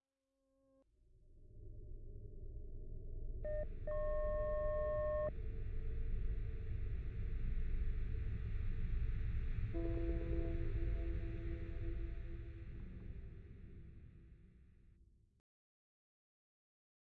Dark Emptiness 012
atmo, flims, experimental, ambient, oscuro, tenebroso, suspenso, dark